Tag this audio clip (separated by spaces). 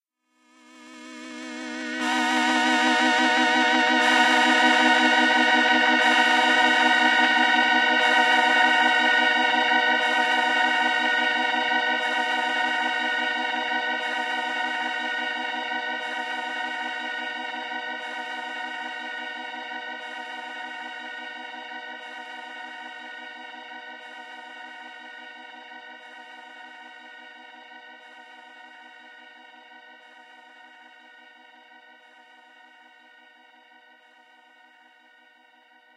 Scfi Mood